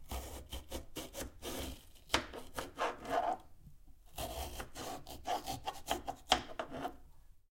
vegetables on chopping board - taglio verdure su tagliere
vegetables on chopping board
lo-feelings
kitchen, cooking, domestic-sounds